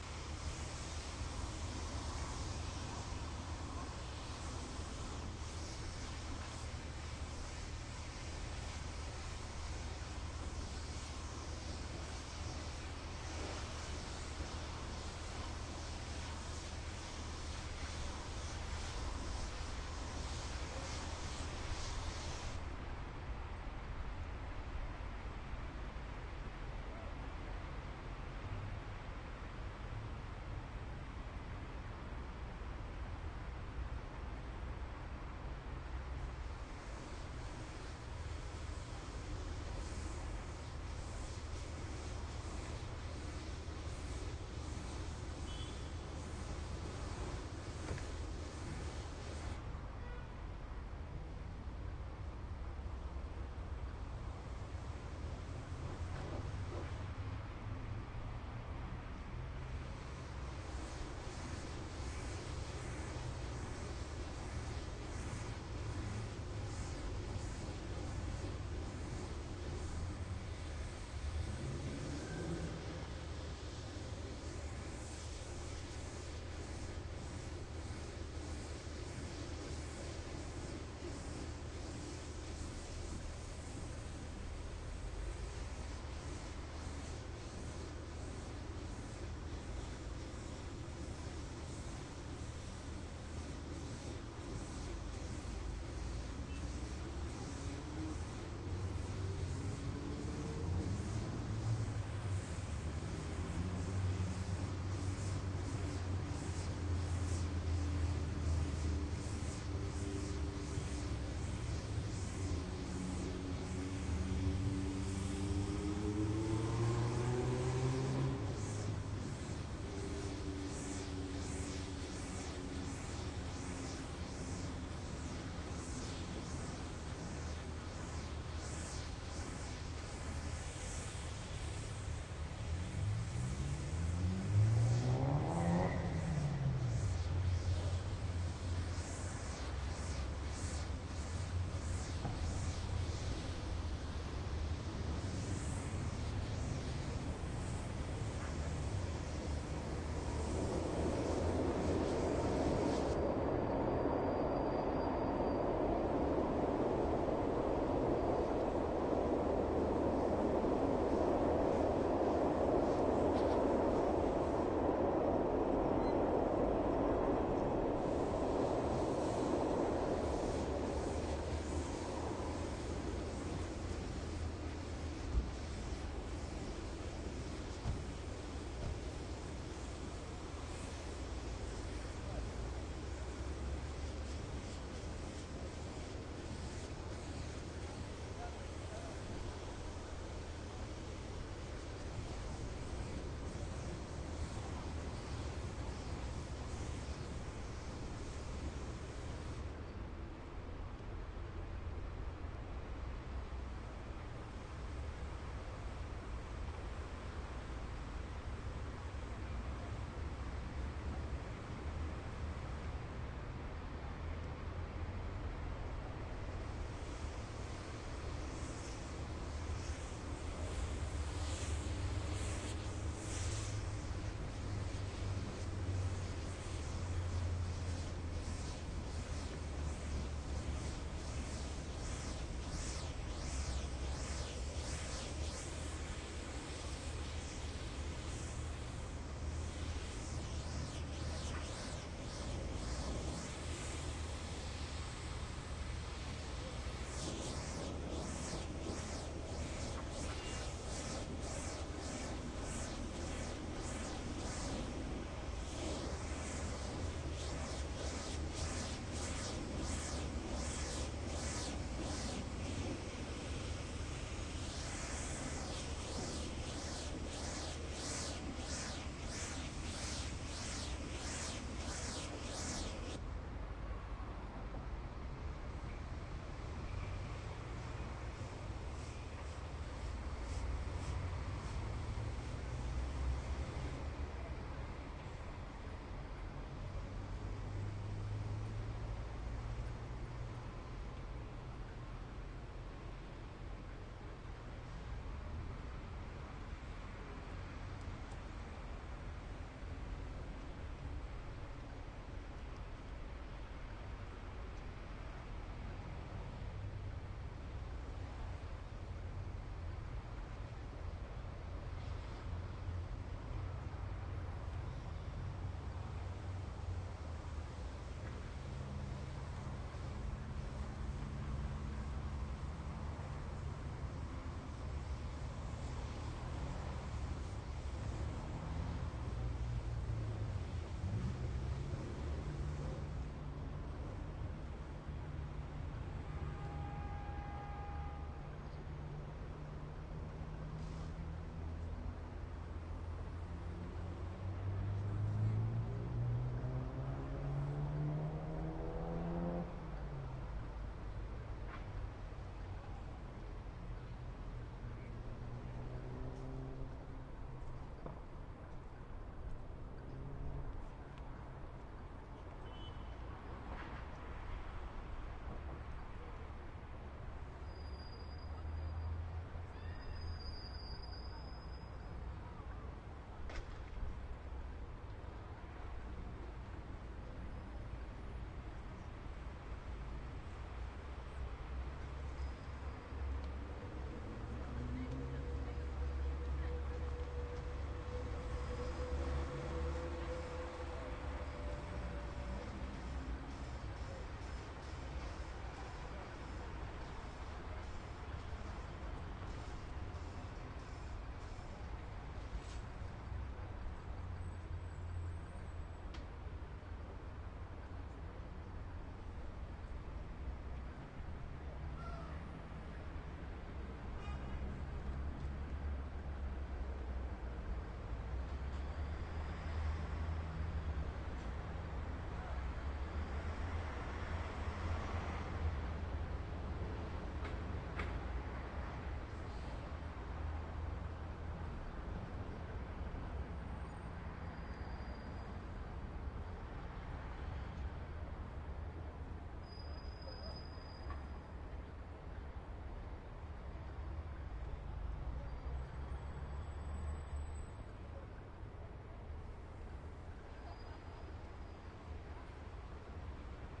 citySoundscape Midnight Rijeka Delta --
large parking surrounded by street in distance train in front workers are washing bridge
bridge, city, delta, rijeka, soundscape, train